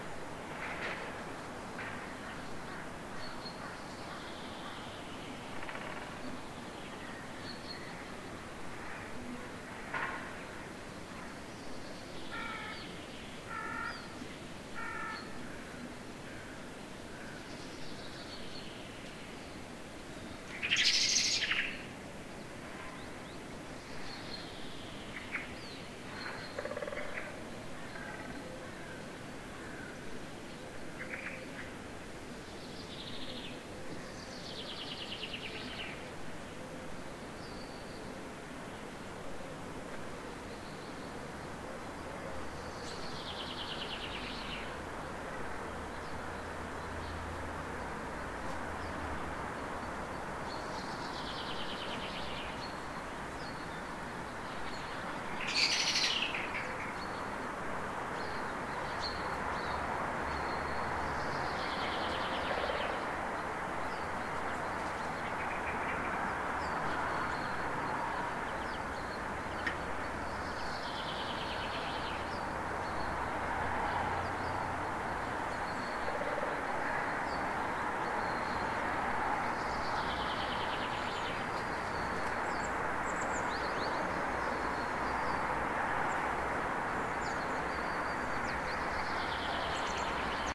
Recorded with Zoom H2 at 7:30 am. Near street-noice with several birds